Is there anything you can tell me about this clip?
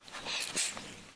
17 cat sneeze
Cat is sneezing. Wow, I did not expect that
kitten, sneeze